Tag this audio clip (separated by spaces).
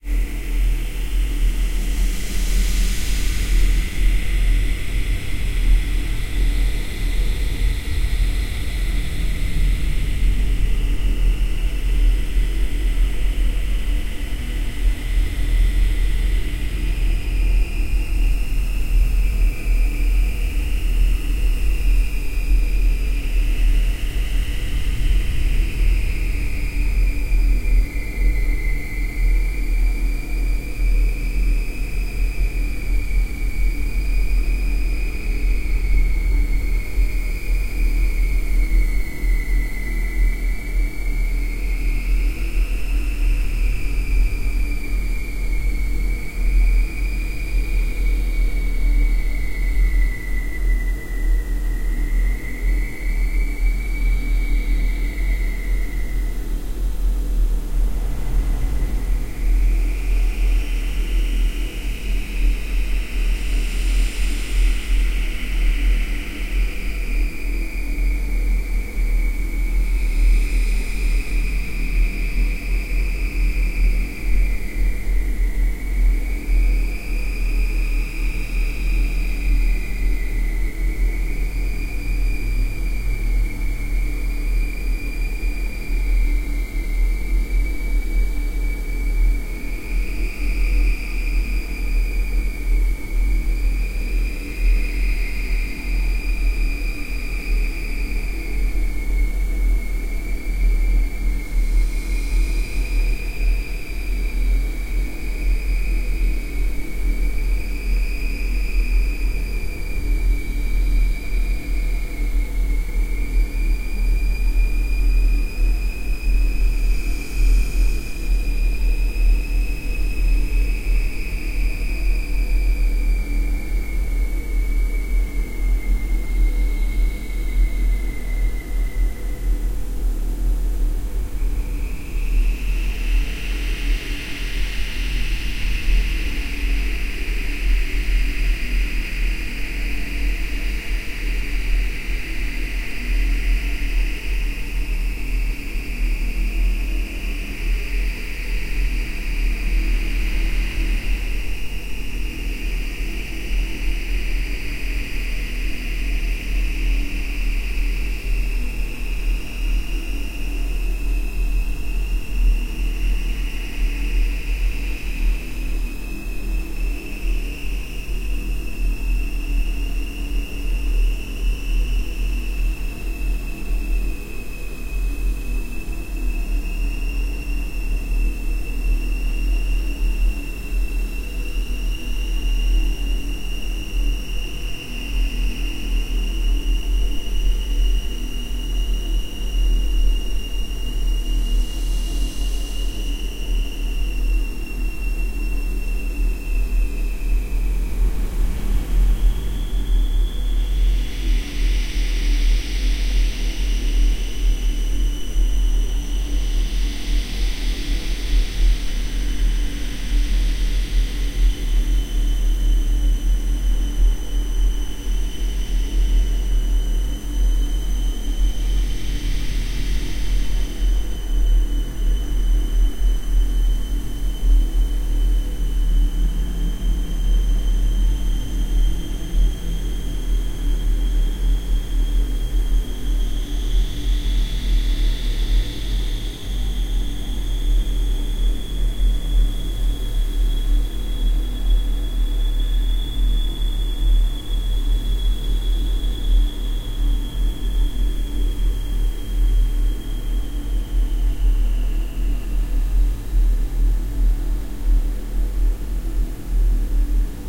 spooky horror tense scary